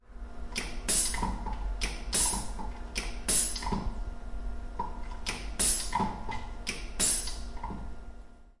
This is the sound of a machine which dispenses fluids using a compressed air system It is used at BERG (UPF) to craft electronic circuits for their biomedic works.